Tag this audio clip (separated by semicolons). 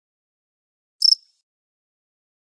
clean; dry; close; cricket